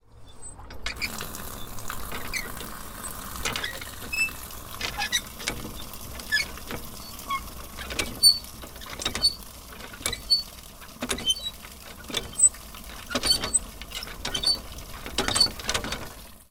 Water pumping
Pumping water from a well.
There's a screaming sound of holder and sound of pumped water in the behind
Pumping, Czech, Pansk, Panska, CZ, Water, Village